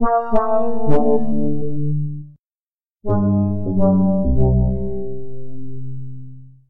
dun dun dun synth
a request for a "dun-dun-dun" in fm synth. Felt like trying it out with FM synth.
electronic, fm, tones, dun, synth